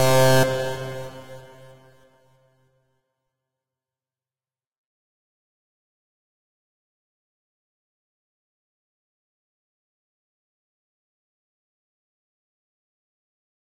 SynthBass+FlangerUltrathin+Revb
Sound created with FL Studio, basic effects, others plugins
studio, FL, bass, synth, loop, electronic, fx